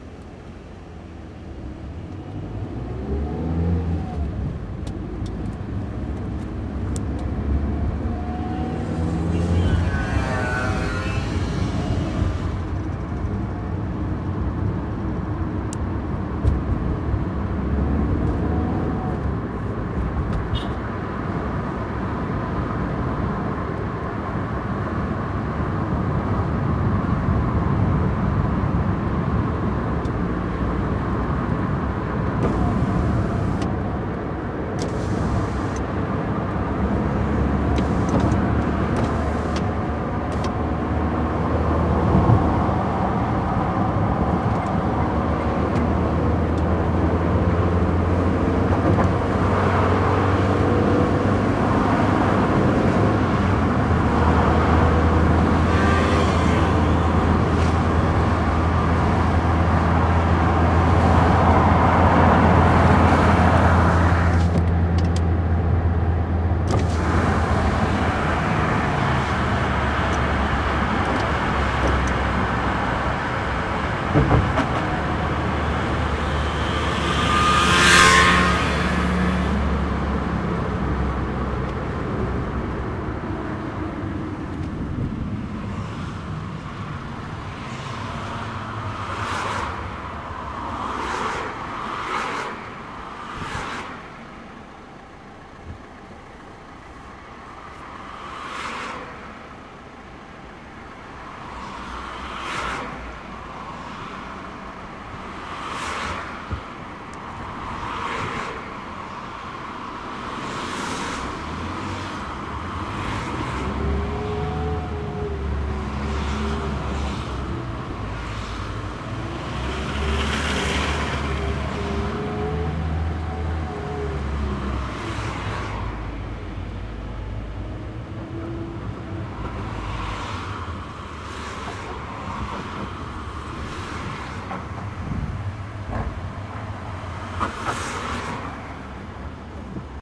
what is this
car drive
Car trip.
Recorded with Edirol R-1 & Sennheiser ME66.
cars, drive, driving, trip, way